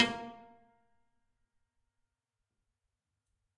Tiny little piano bits of piano recordings
sound, horndt, marcus, noise, sounds